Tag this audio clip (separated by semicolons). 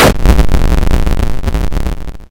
16-bit; nes; retro